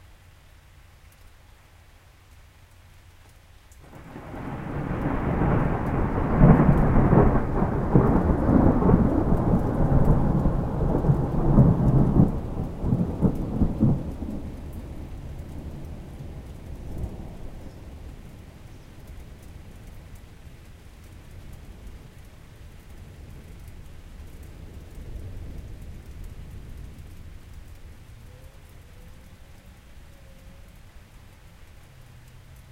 rain thunder 02
Thunder with rain. Recorded with Audio-Technica AT2020.